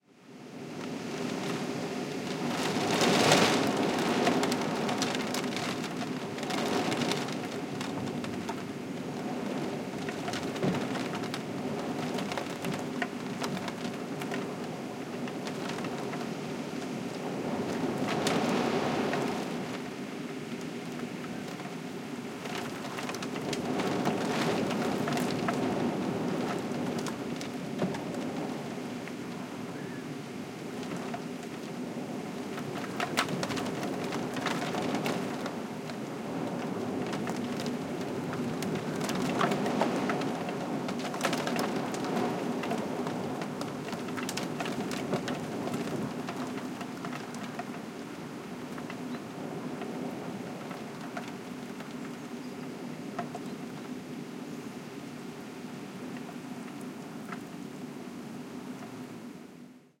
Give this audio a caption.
Noise of heavy rain + wind gusts on window glass. Primo EM172 capsules into microphone Amplifier FEL BMA2, PCM-M10 recorder. Near La Macera (Valencia de Alcantara, Caceres, Spain)